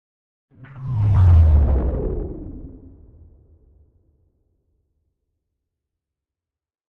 My first try for sound of space jet or something like that...
Used sounds:
- jet
- closing door
- helicopter
- fan